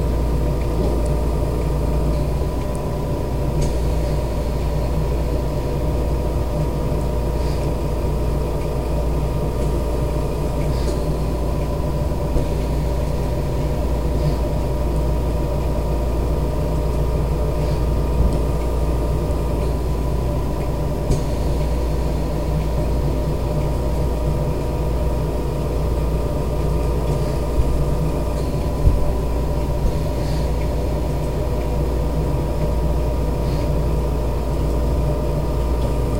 Home Oxygen Concentrator
A few cycles of my dad's home oxygen machine with a ticking battery operated clock in the background recorded in the early morning in the living room with Lifecam HD3000 Webcam at the end of about 16 feet of USB cable dragged out of my bedroom. He's about 6 feet away, I was with my back to the room with my camera pointed at my chest so he wouldn't think I was filming. A full cycle seems to last from between 7 to 10 seconds.
From Wikipedia
Oxygen concentrators typically use pressure swing adsorption technology and are used very widely for oxygen provision in healthcare applications, especially where liquid or pressurised oxygen is too dangerous or inconvenient, such as in homes or in portable clinics.
Oxygen concentrators are also used to provide an economical source of oxygen in industrial processes, where they are also known as oxygen gas generators or oxygen generation plants.
clank, humming, home-health, pressurized, Hum, machinery, hiss, MOTOR, compressor, home-healthcare, Sounds, air, oxygen-machine, clock, ticking, medical, tick, electric, breathing, machine, cycles, oxygen-concentrator, mechanical, air-compressor